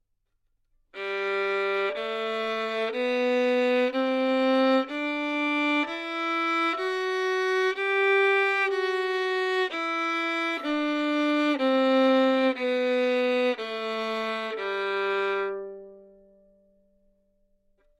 Violin - G major
Part of the Good-sounds dataset of monophonic instrumental sounds.
instrument::violin
note::G
good-sounds-id::6273
mode::major
Gmajor, good-sounds, neumann-U87, scale, violin